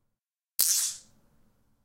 Electricity sounding with two magnetic rocks.
This sound is used in Brawlhalla on Legends (characters) Orion & Seven.